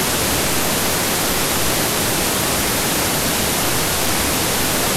white noise with some low frequencies
White noise with wide range of spectrum
noise, white, white-noise